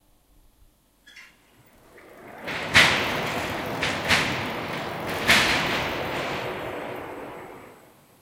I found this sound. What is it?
Laser Machine Sheet Change
Industrial, low, Mechanical, Rev